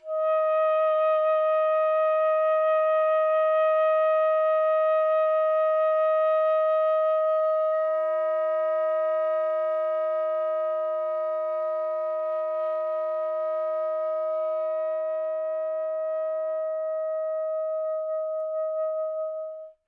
A long tone (approx. E flat) that evolves to multiphonics.
howie, long, multiphonics, sax, smith